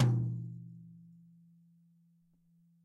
Drums Hit With Whisk
Whisk, Drums